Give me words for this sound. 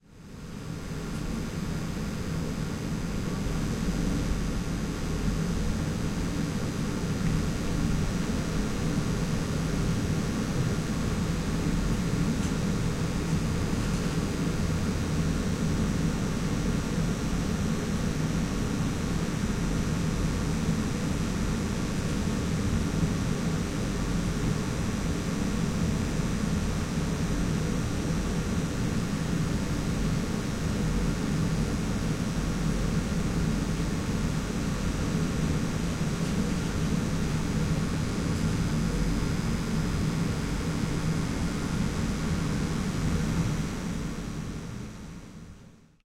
recorded with a olympus LS-11 in the basement
sounds from neon lamps and outgoing air in chimney
basement, neon-lamp, outgoing-air, field-recording, ambience